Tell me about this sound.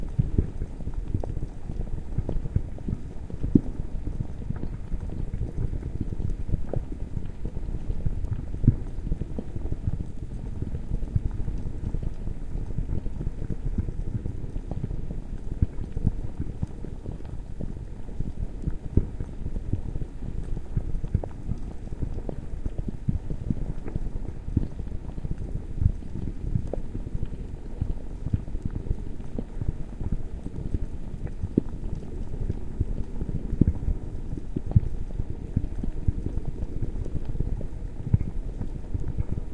Lava Loop 3
A slowed down and heavily edited recording of a chemical boiling I recorded in my chemistry class. Sounds like a large reservoir of magma, so it be suitable in a volcano setting. Loops perfectly.
Recorded with a Zoom H4n Pro on 08/05/2019.
Edited in Audacity